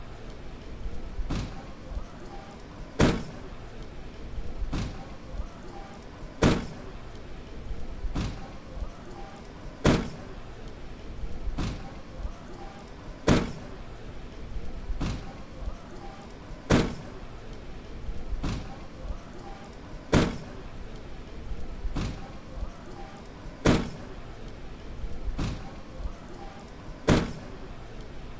A van door closing twice. This is repeated 8 times (16 doors closing).